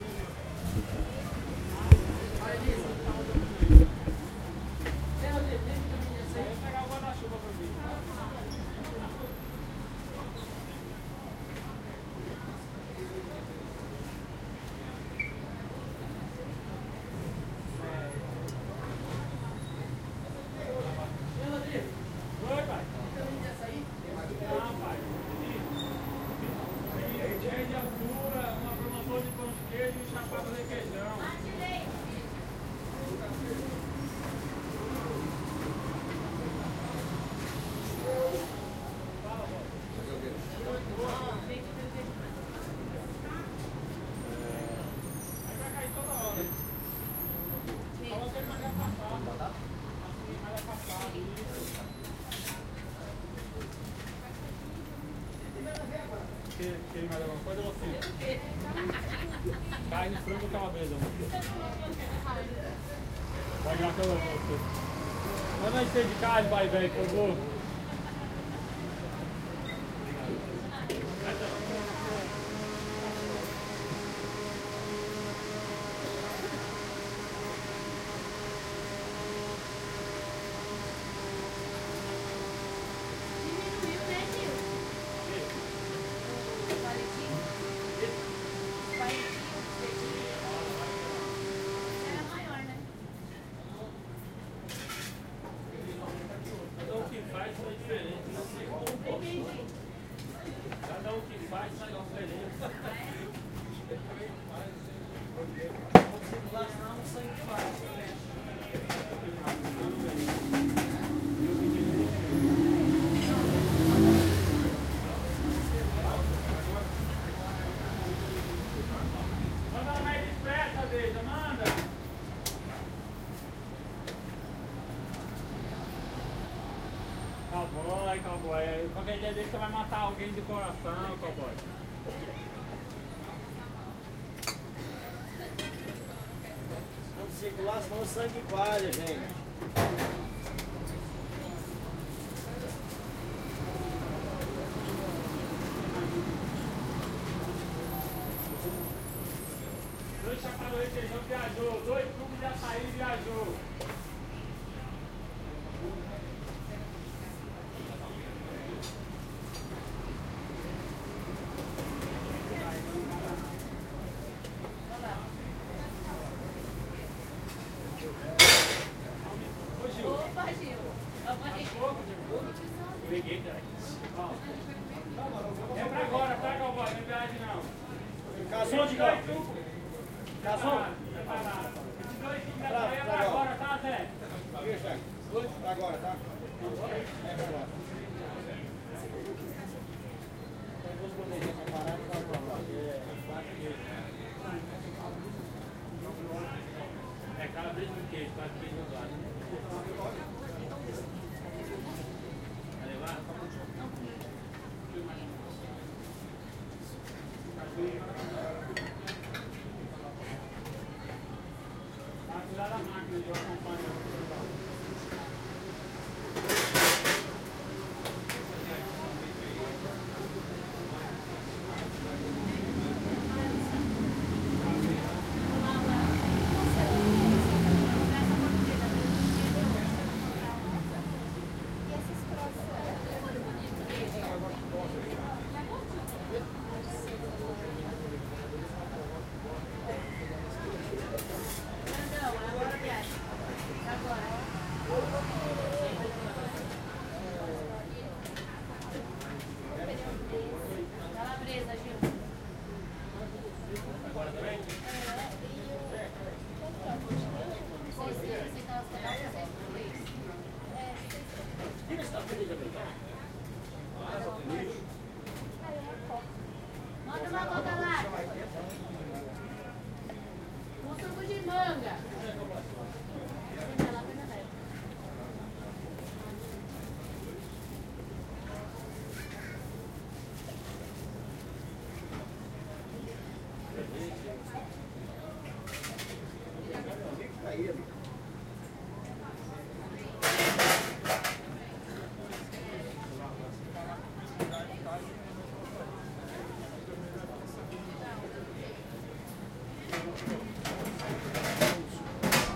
Snack Bar Ambience in São Paulo, Brazil
Short recording of a snack bar ambience in a street corner in downtown São Paulo. Recorded using a Zoom H1 portable recorder without any edits.
ambience, no-edit, field-recording, s, machines, zoom-h1, atmosphere, city, brazil, o-paulo, street, traffic, ambient, people, dialog, announcements, soundscape, town, noise